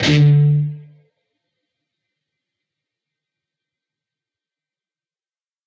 Dist Chr D5th up pm
Standard D 5th chord. A (5th) string 5th fret, D (4th) string 7th fret, G (3rd) string, 7th fret. Up strum. Palm muted.